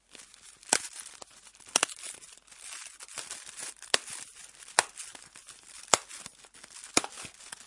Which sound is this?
chocolate bar breaking
Thank you for the effort.